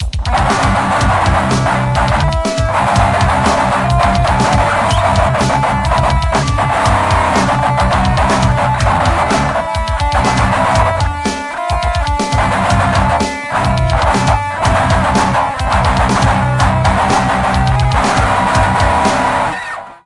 Metal Adventure
A neat little loop I made, guitar recorded directly from my amplifier, and I used Rhythm Rascal to make the drums.